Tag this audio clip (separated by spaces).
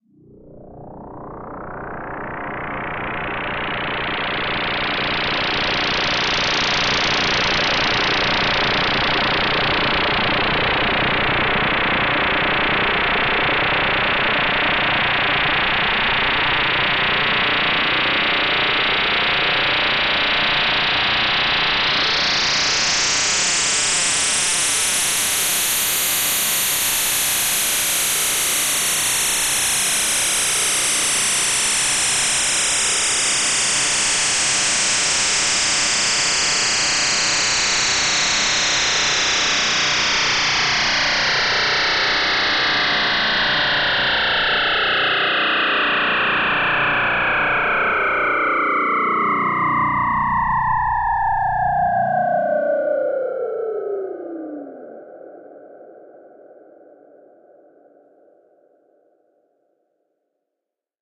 fm pulses effect space electronic synth